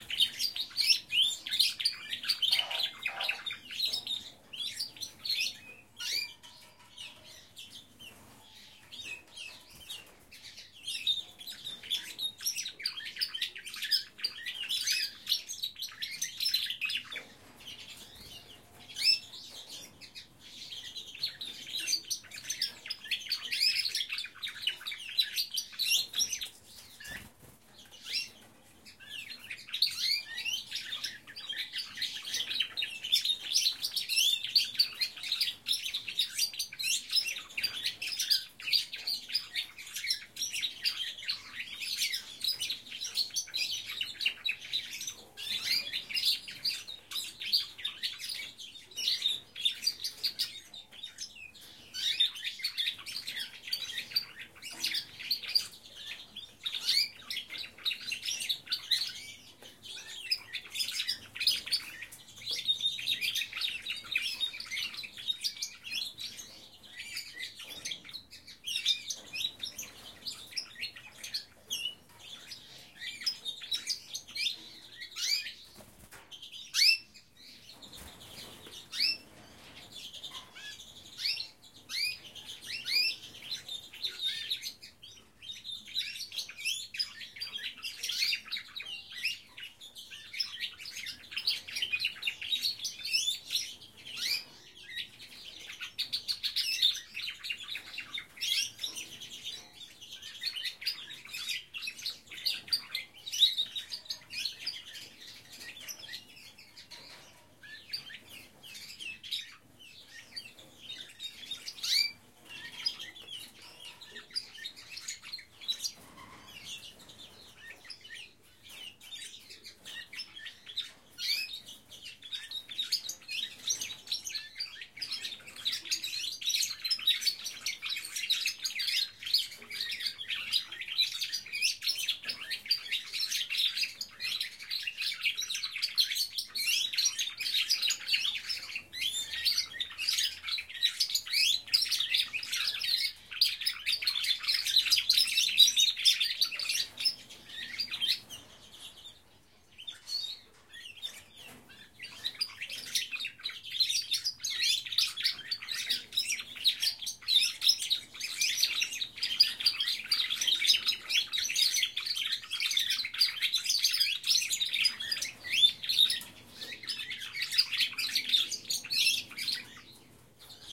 birds-inbigcage
Sound of birds in a cage
Own recording, zoom H4N